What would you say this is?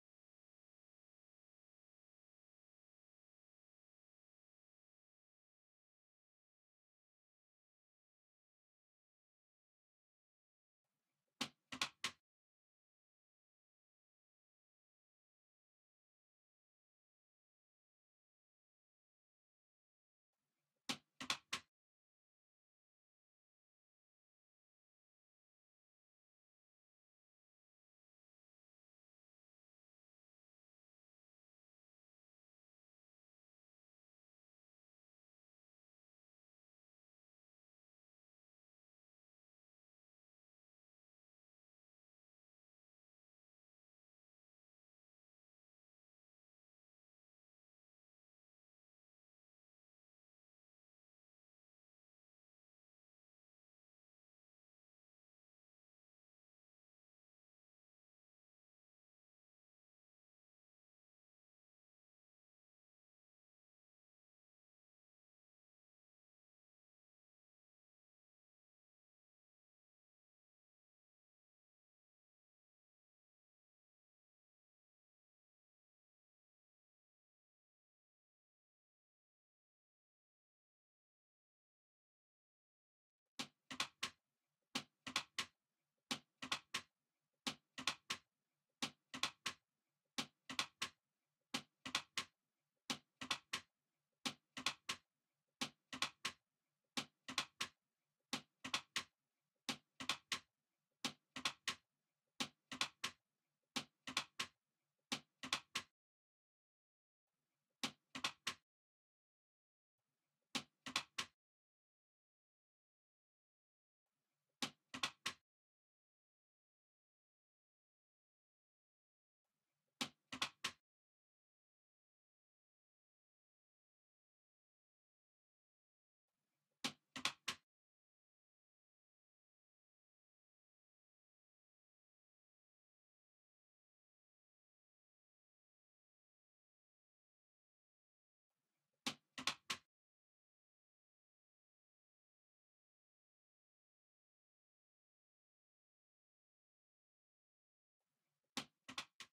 Desk Hitting
Hitting on a desk recorded with a MacBook Pro.
Desk, MacBook, Hitting